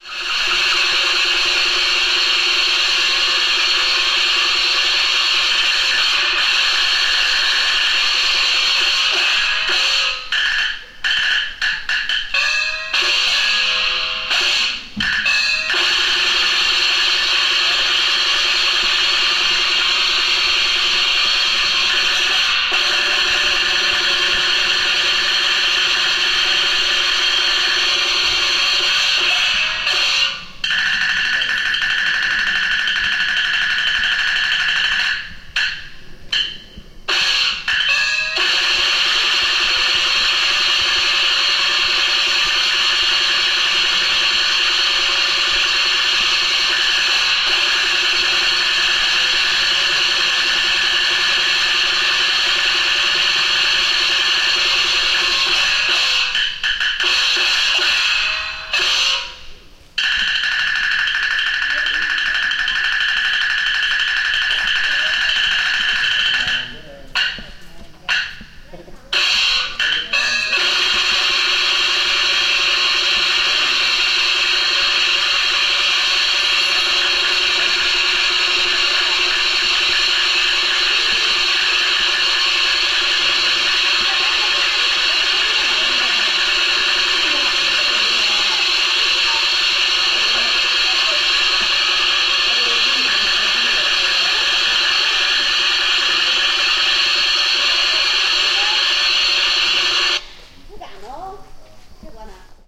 QFZ China Village Percussion
Recorded in the ancient village of Qing Feng Zhai, People's Republic of China.
ambience, Asia, bang, chao, China, Chinese, clang, clanging, culture, cymbal, cymbals, Feng-Shui, field-recording, metal, opera, percussion, performance, tiger, traditional, village, wind, Wuyi, Zhejiang